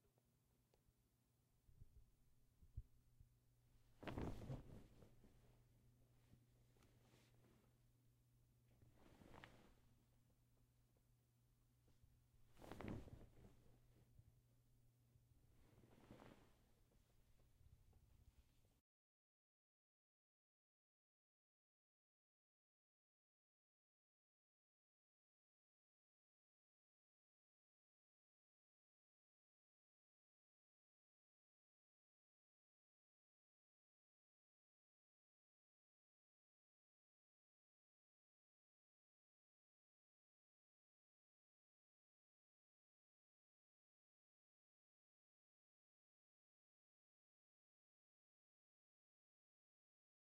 untitled sitting on bed
sitting on a bed
bed, field-recording, sitting